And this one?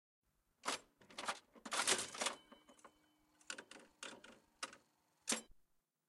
arcade old slot bell pull 1
Recordings of arcade games and atmos from Brighton seafront